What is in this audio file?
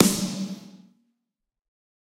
Snare Of God Wet 030
realistic, pack, kit, drumset, drum, set, snare